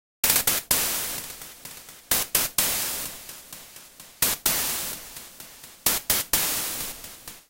drum-like rhythm created from noise